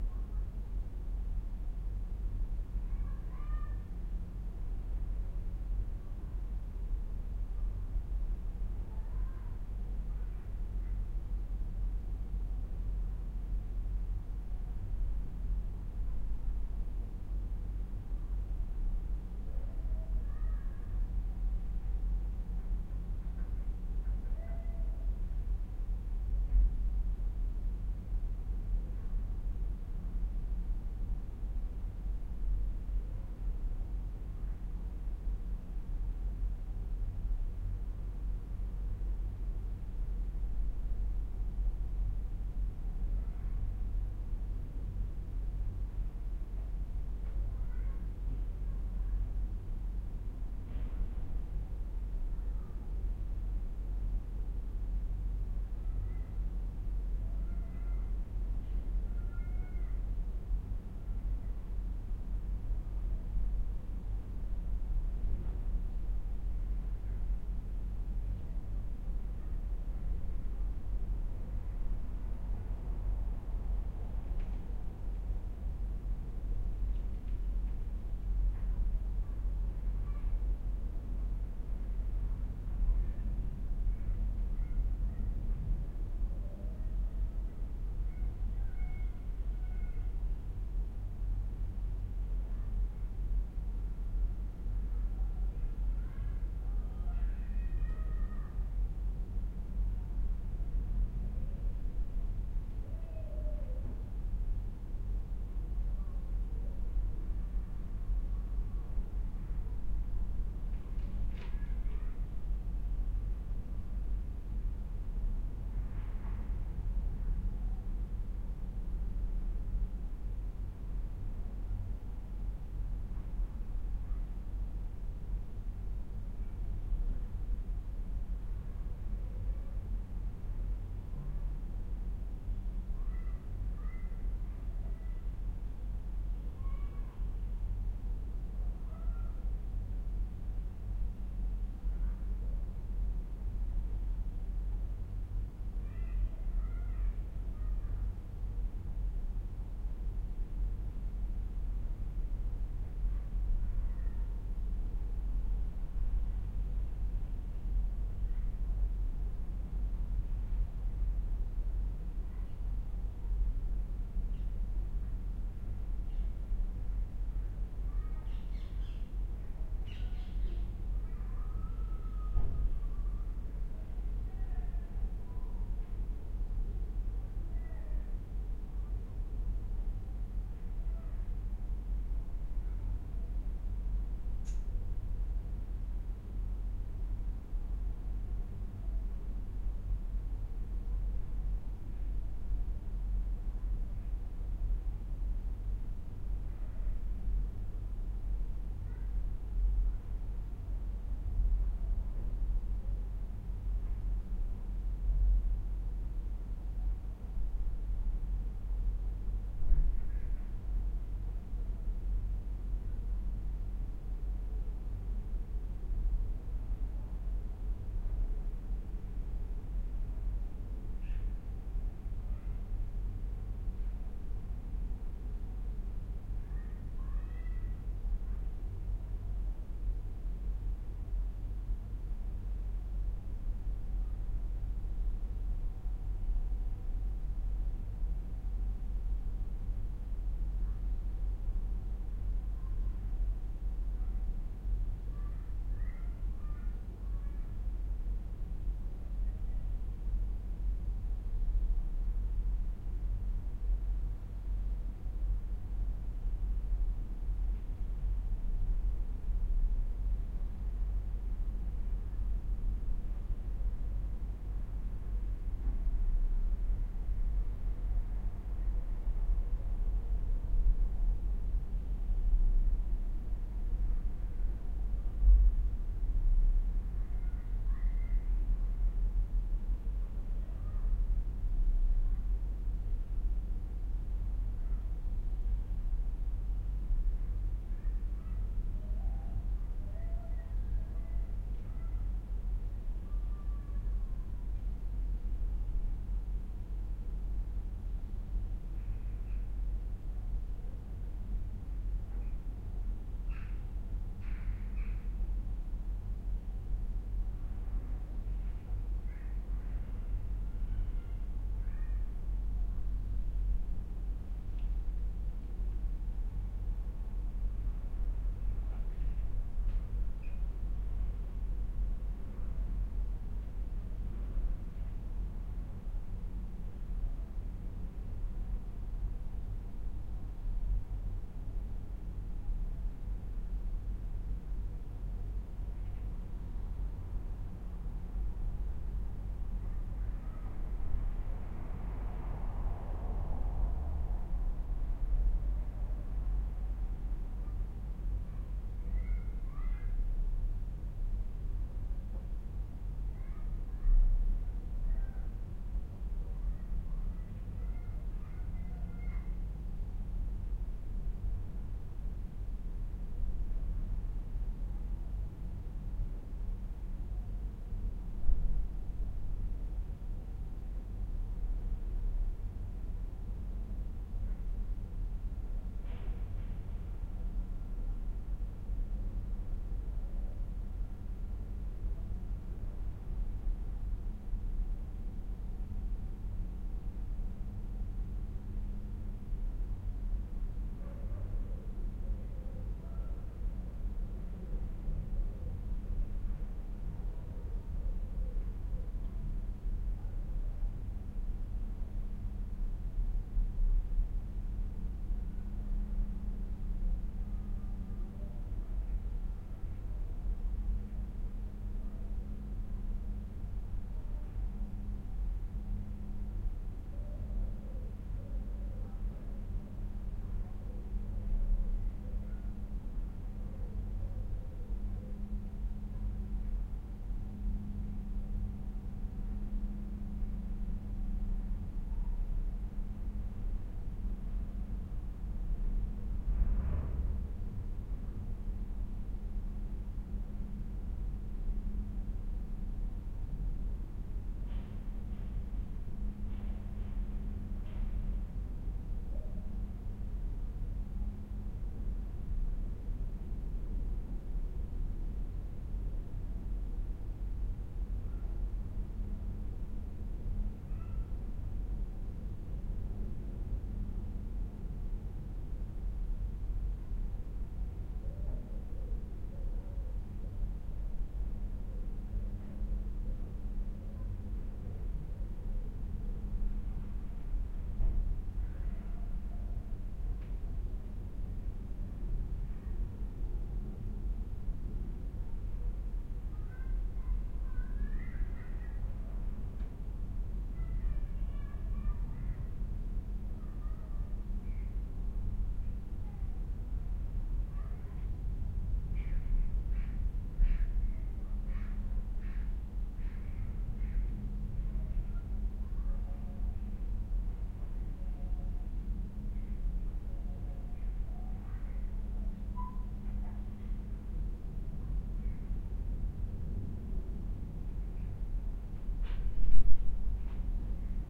living-room 15:00
ambience of an empty living room with closed windows, around 03:00PM.
MKH60/M179-> ULN-2.